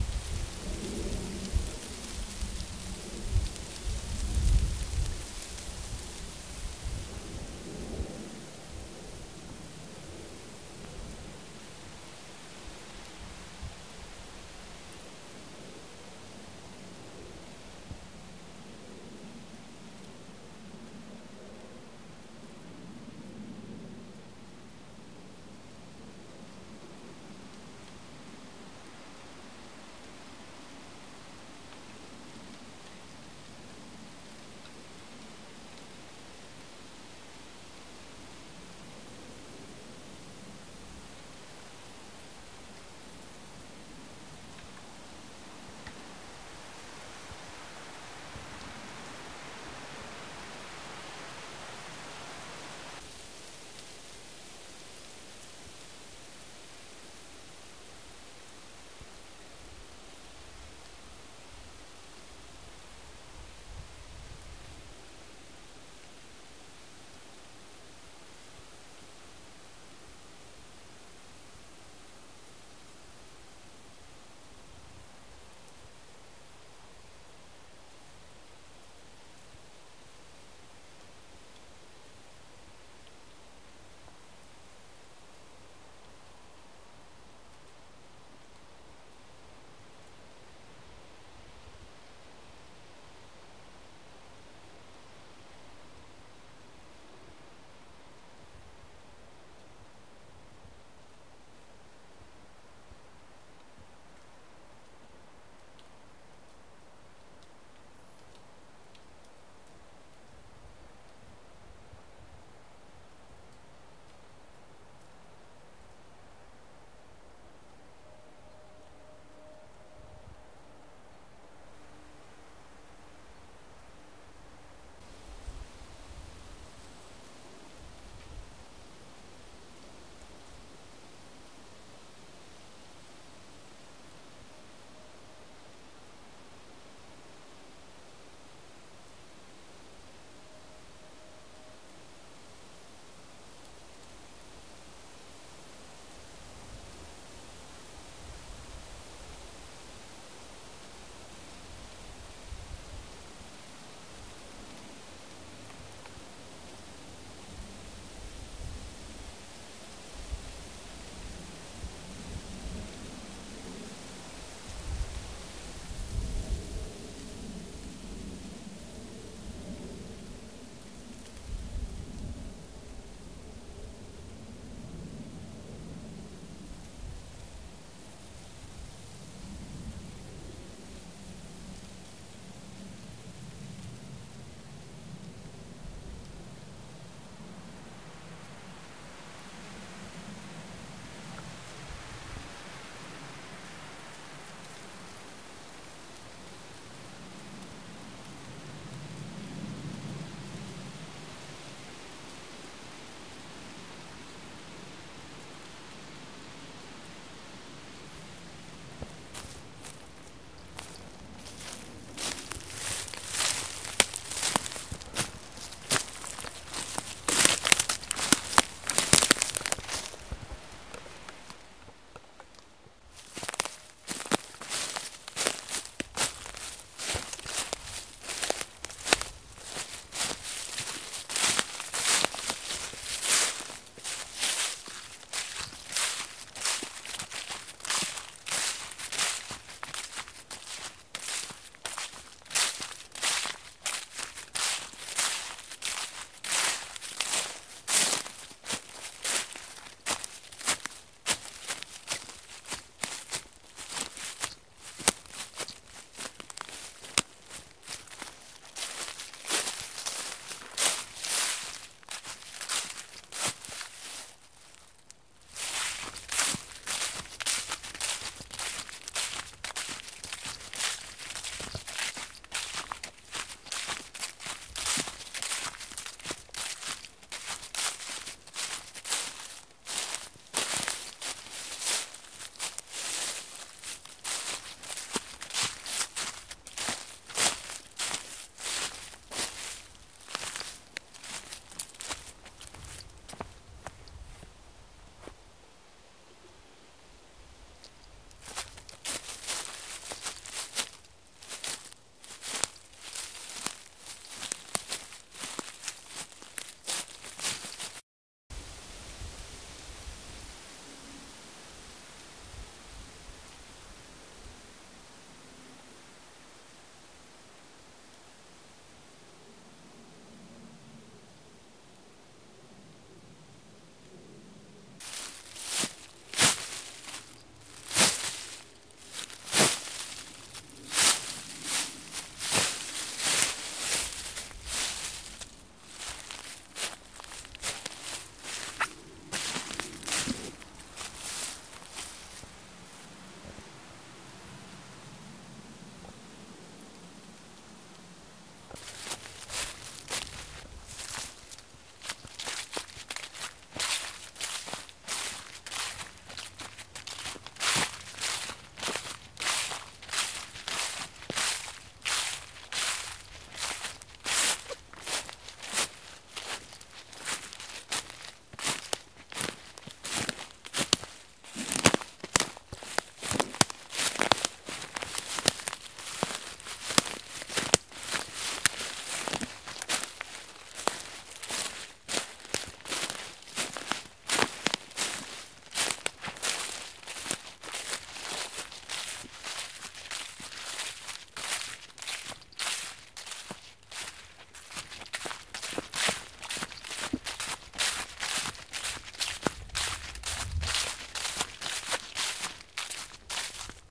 walking ambience forest autumn crunchy step walking leafes leaves walk footstep crunch
ambience, autumn, crunch, crunchy, footstep, forest, leafes, leaves, step, walk, walking